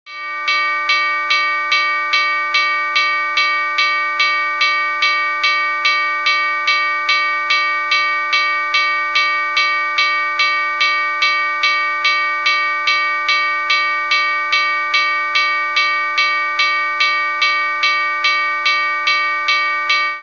Railway/Level Crossing Sond Effect
The sound played to alert you of an incoming train at a railway crossing.
train, metro, chime